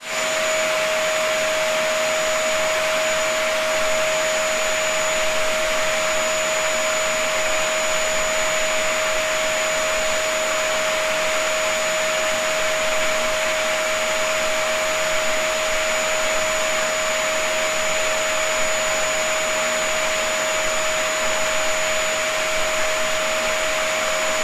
A Dyson vacuum cleaner running static.